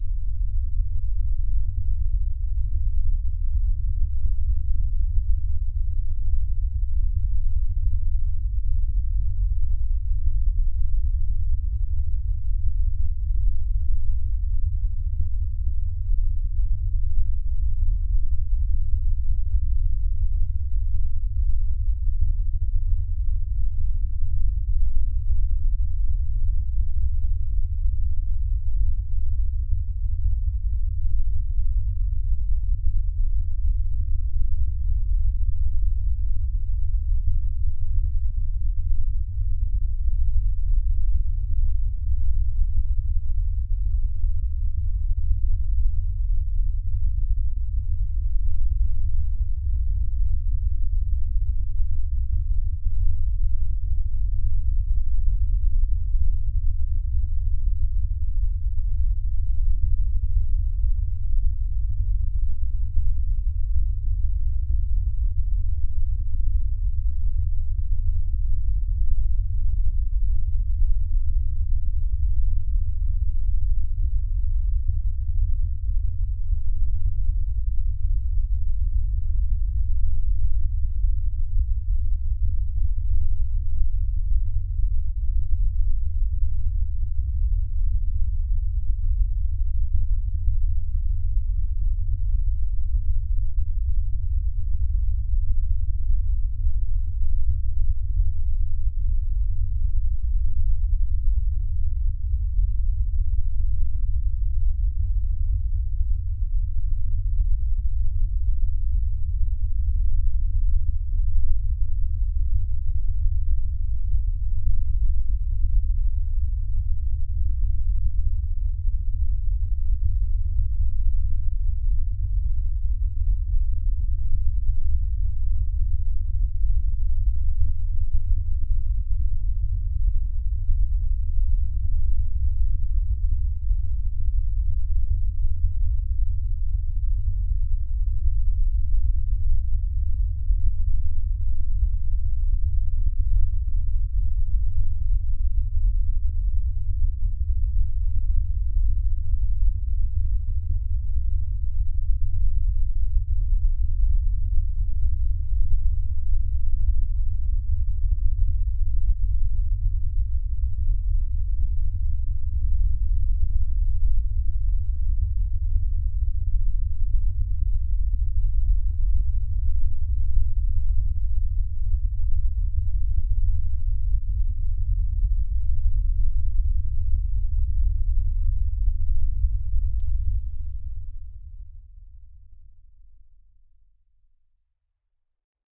Low Rumble Lisa Hammer
A low rumble I created for feelings of dread and tension. Enjoy!